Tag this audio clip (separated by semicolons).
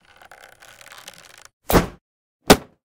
Arrow; Bow; Impact